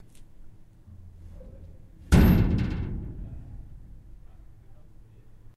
industrial skipbin close reverb
skip bin being closed. left to ring for reverb.
bin, close, industrial, reverb, skip-bin